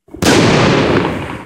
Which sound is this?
Made with fireworks
bang, firework, fire-works, wide, destroy, fireworks, long, boom, explosion